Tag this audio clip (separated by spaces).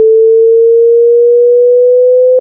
alarm siren wail